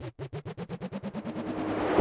Laser Charge
Sound effect made using Ableton's Operator and Analog synths. Can be sped up or slowed down for variation.
beam, charge, charging, energy, laser, powerup, sci-fi, space, spaceship, weapon